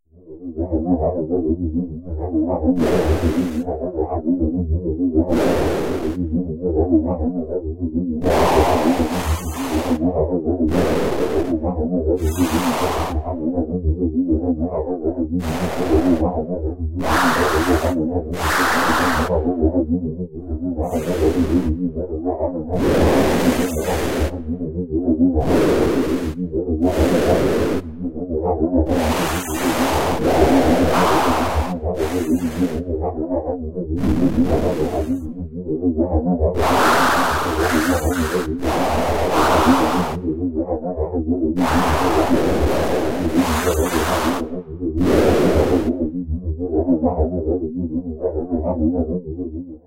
Machinery BM
Various artificially created machine or machinery sounds.
Made on Knoppix Linux with amSynth, Sine generator, Ladspa and LV2 filters. A Virtual keyboard also used for achieving different tones.
Factory, Machine, Machinery, Mechanical